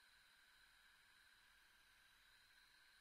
Hose - Leak free
Air hose leaking freely.
hose, air, metalwork, 80bpm, leak, tools, 1bar, air-pressure